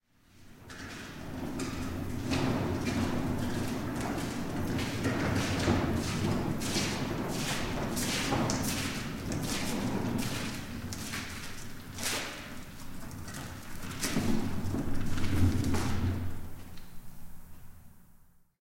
Person Pushing Cart down hallway
Person walking pushing a cart with wheels down concrete hallway. Some reverb.
cart, Free, hallway, pushing, roll, rolling, rolls, Sound, wheel, wheels